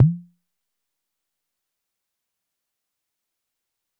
Tonic Tom 2
This is an electronic tom sample. It was created using the electronic VST instrument Micro Tonic from Sonic Charge. Ideal for constructing electronic drumloops...
drum, electronic